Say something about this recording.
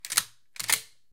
20 Gauge Action Rack 6
Racking, or cycling, the action of a 20 Gauge shotgun.
rack, shooting, gun, shotgun, action, 20-Gauge